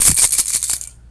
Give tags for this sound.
rattle; percussion; toy

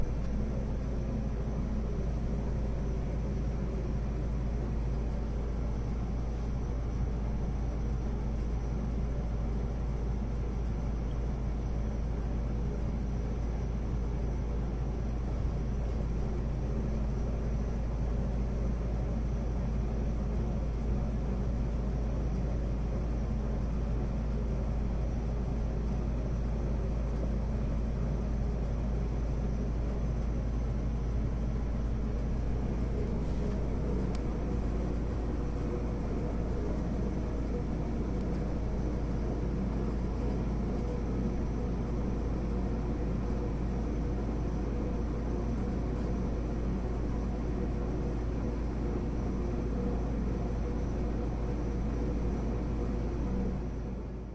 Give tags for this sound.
noise; vent; field-recording; air-vent; ventilation

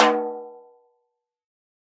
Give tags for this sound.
multisample; 1-shot; velocity; snare; drum

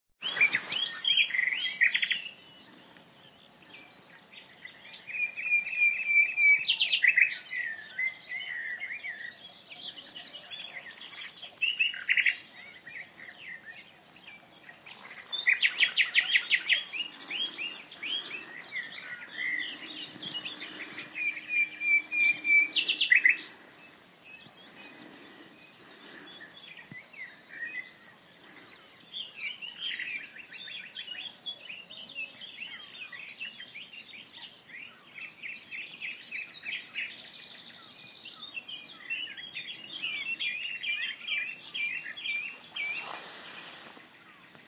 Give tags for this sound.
birds; birdsong; field-recording; forest; morning; nature; spring

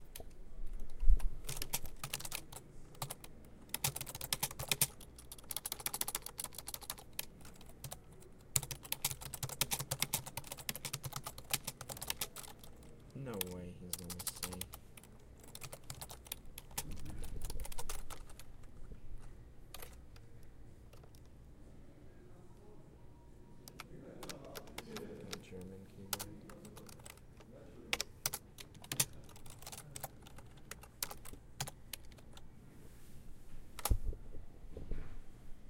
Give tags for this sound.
game; games; play

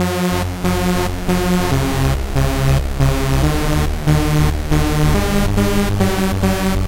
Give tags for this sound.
techno; sytrus; lead; saw; trance

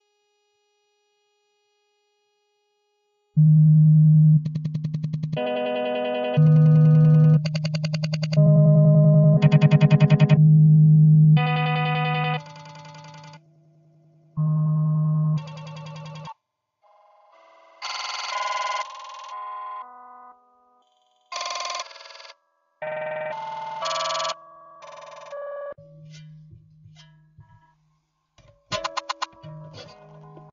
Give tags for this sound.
ambient; home; instrument; made

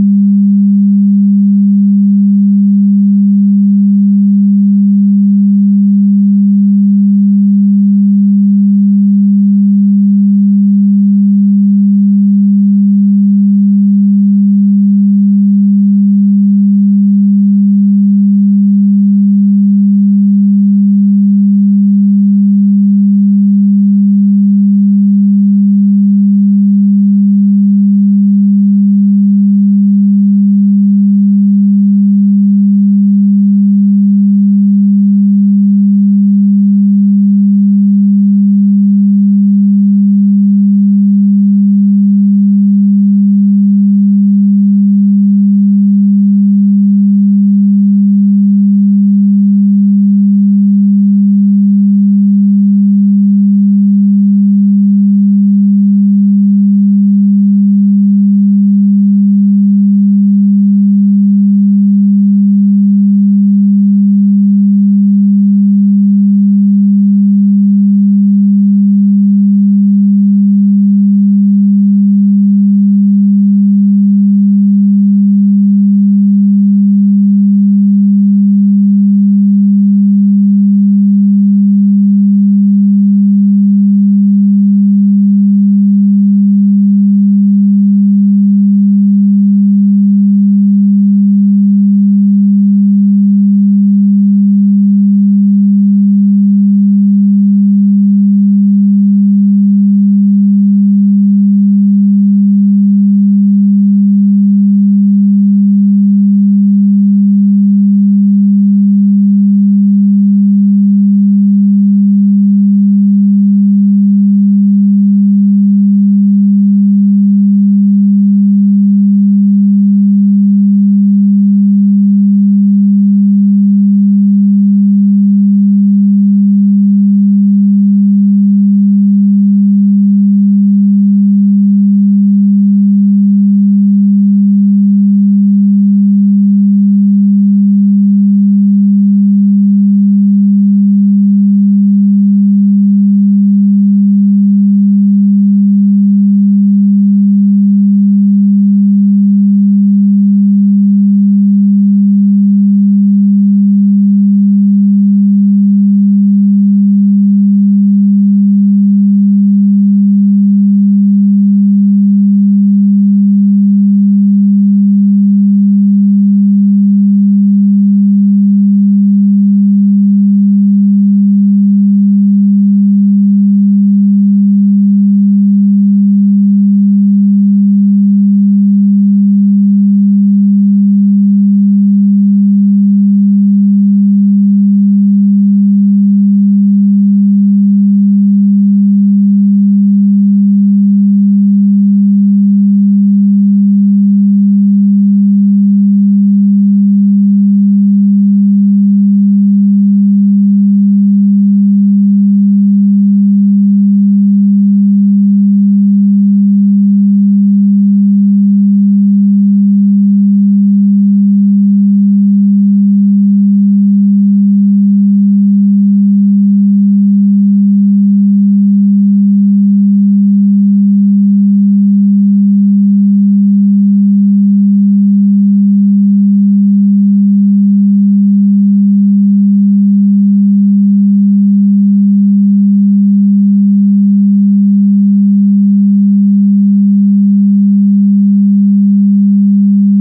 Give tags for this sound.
electric; synthetic; sound

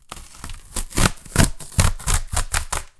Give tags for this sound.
cardboard; noise; packaging; paper; rip; ripping; rustle; shred; tear; tearing; tearing-paper

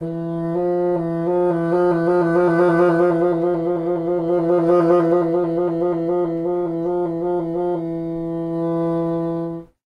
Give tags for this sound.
wind; classical; fagott

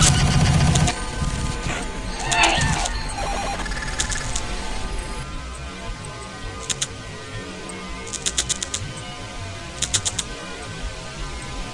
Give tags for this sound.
ambient; remix; ugly-organ

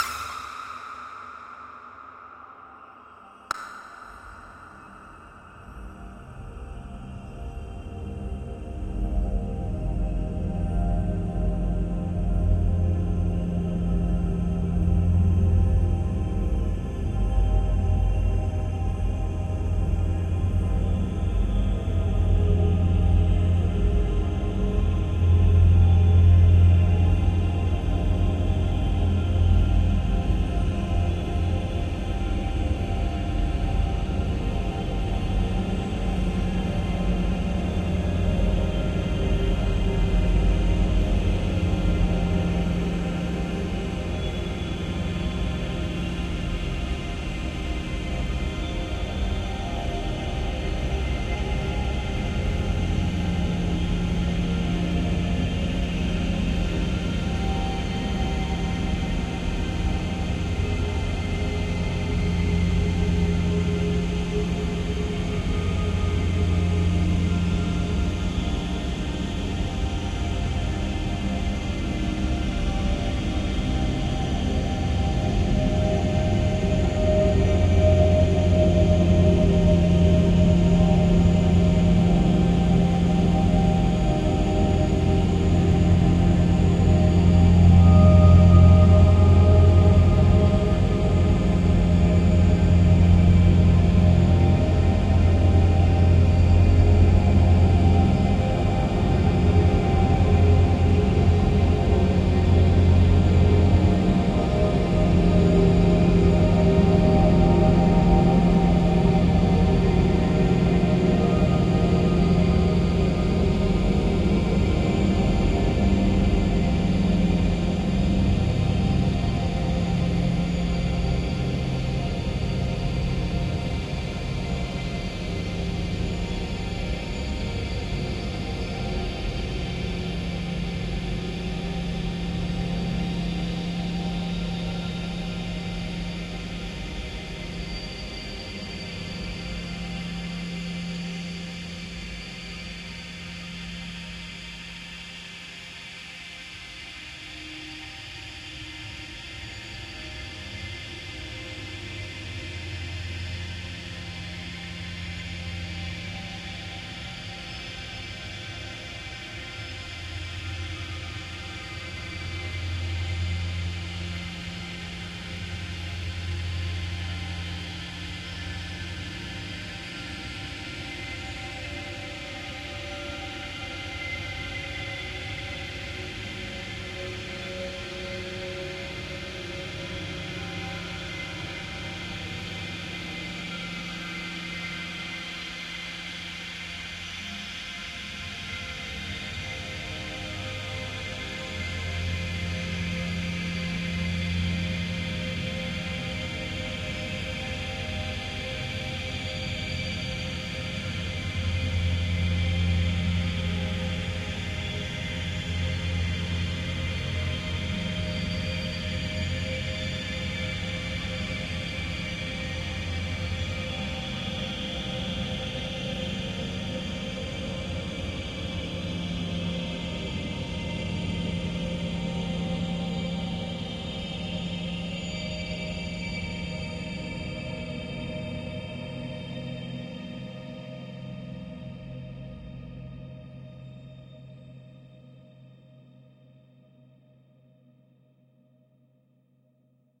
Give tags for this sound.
ambient; artificial; divine; multisample; pad

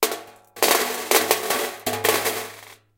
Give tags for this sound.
clatter; game; glass; mancala; metal; rhythm

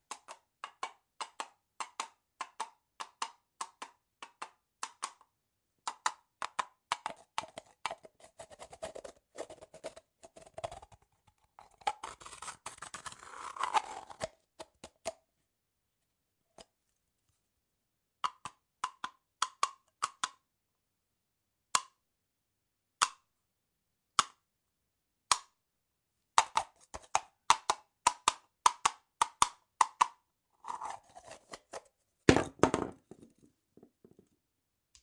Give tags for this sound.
horse; coconut; wood; neigh; toy; percussion

